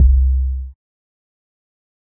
Sub Kick made with Logic Pro X's ultra beat.
beat, drums, Logic, Ultra